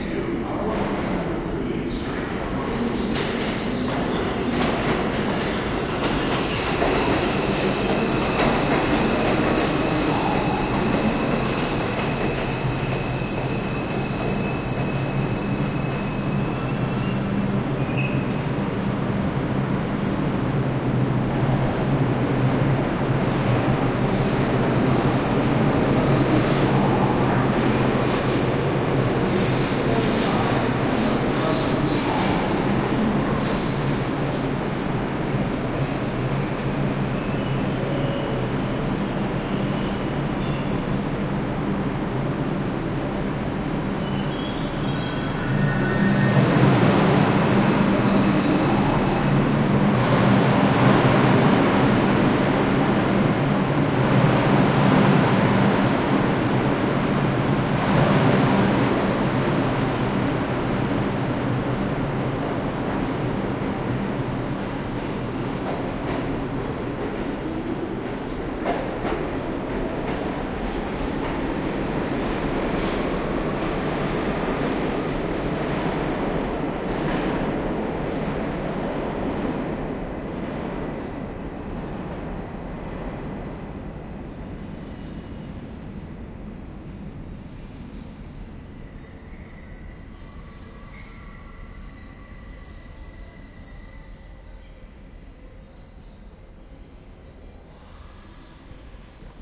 Trains Arriving and Departing (NYC Subway)
Trains arriving and departing. NYC Subway.
*If an MTA announcement is included in this recording, rights to use the announcement portion of this audio may need to be obtained from the MTA and clearance from the individual making the announcement.
nyc, station, subway, new-york, underground, field-recording, train, mta